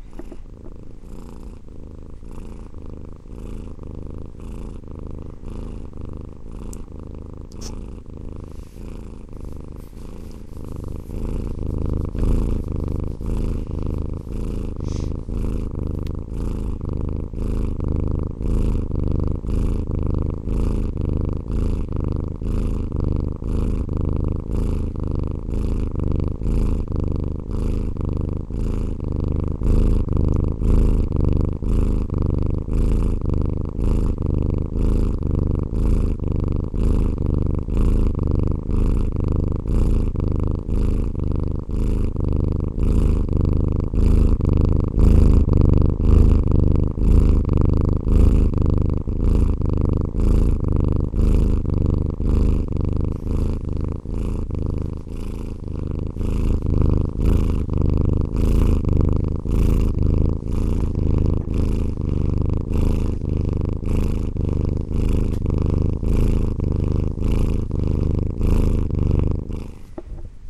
pucky spinnen 03
Our cat Puk/Pucky purrs loudly. A lengthy sample. Recorded with a Zoom H2 recorder.
animal, cat, meow, purr